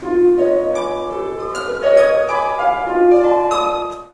Some detuned harps - tuned in quartertones recorded in big concert hall with Olympus LS11
detuned, harp, quarter-tone